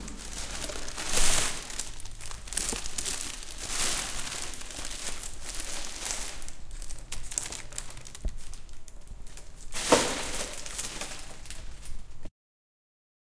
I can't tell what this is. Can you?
Taking out the trash